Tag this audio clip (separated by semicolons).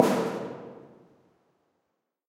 hit; metal